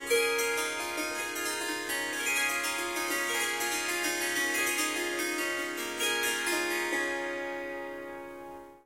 Harp Tinkly Riff 1
Melodic Snippets from recordings of me playing the Swar SanGam. This wonderful instrument is a combination of the Swarmandal and the Tampura. 15 harp strings and 4 Drone/Bass strings.
In these recordings I am only using the Swarmandal (Harp) part.
It is tuned to C sharp, but I have dropped the fourth note (F sharp) out of the scale.
There are four packs with lots of recordings in them, strums, plucks, short improvisations.
"Short melodic statements" are 1-2 bars. "Riffs" are 2-4 bars. "Melodies" are about 30 seconds and "Runs and Flutters" speaks for itself. There is recording of tuning up the Swarmandal in the melodies pack.
Melody, Riff, Surmandal, Swar-sangam, Harp, Indian, Swarsangam, Strings, Swarmandal, Melodic, Ethnic